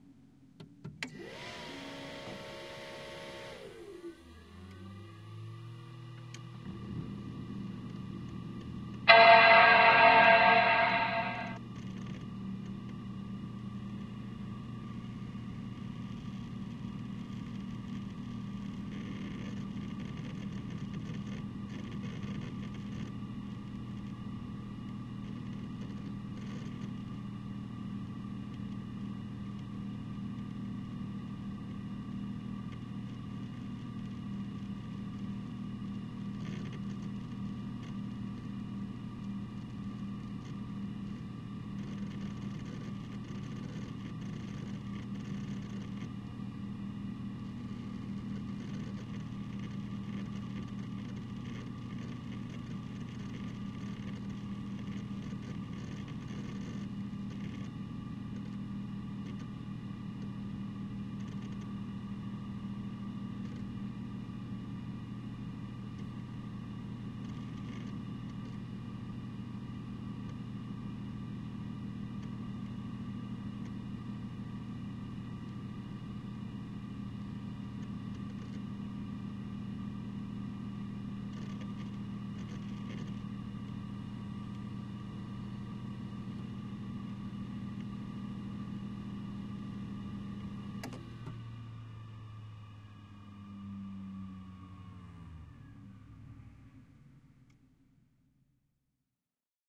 CR InteriorMacPro
Mac Pro starting up, running, and shutting down - interior perspective.
fan, clicks, start